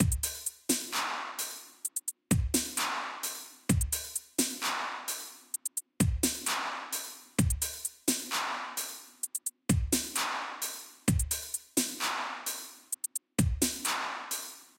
Dubstep Drum+Perc loop 130bpm
A Dubstep Drum loop that I created intended for use in either Dubstep or Chillout.